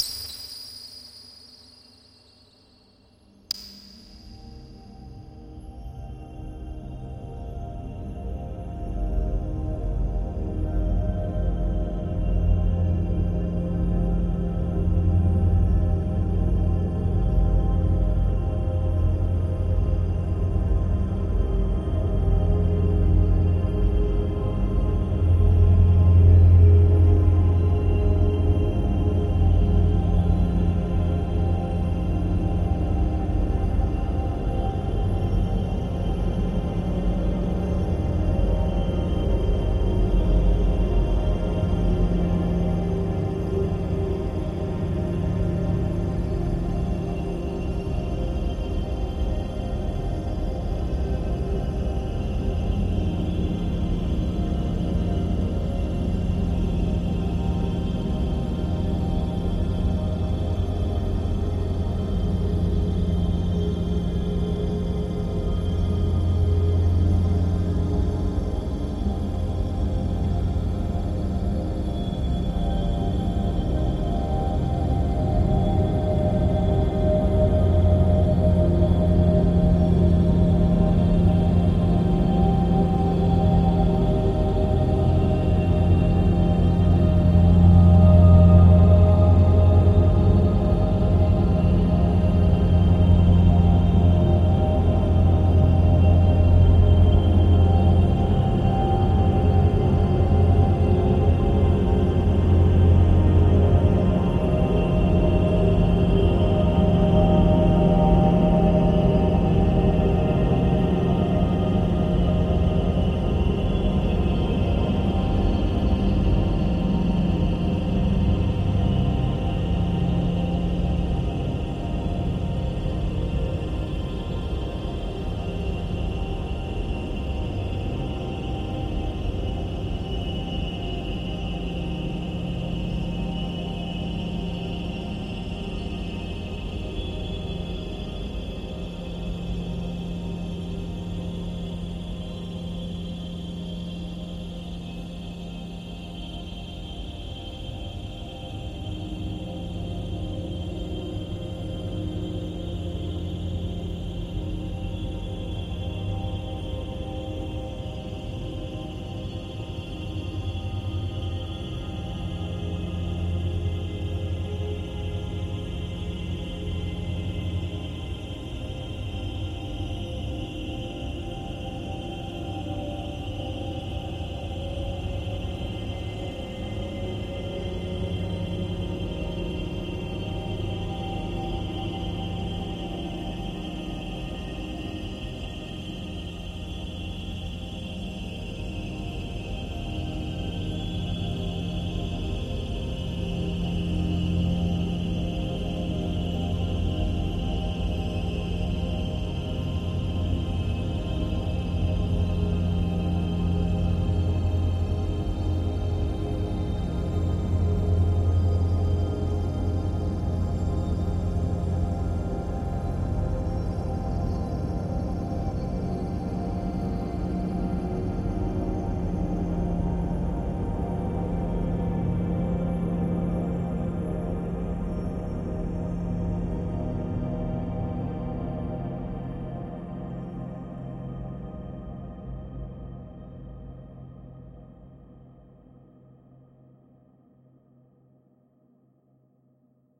LAYERS 011 - The Gates of Heaven-119
LAYERS 011 - The Gates of Heaven is an extensive multisample package containing 128 samples. The numbers are equivalent to chromatic key assignment. This is my most extended multisample till today covering a complete MIDI keyboard (128 keys). The sound of The Gates of Heaven is already in the name: a long (exactly 4 minutes!) slowly evolving dreamy ambient drone pad with a lot of subtle movement and overtones suitable for lovely background atmospheres that can be played as a PAD sound in your favourite sampler. At the end of each sample the lower frequency range diminishes. Think Steve Roach or Vidna Obmana and you know what this multisample sounds like. It was created using NI Kontakt 4 within Cubase 5 and a lot of convolution (Voxengo's Pristine Space is my favourite) as well as some reverb from u-he: Uhbik-A. To maximise the sound excellent mastering plugins were used from Roger Nichols: Finis & D4. And above all: enjoy!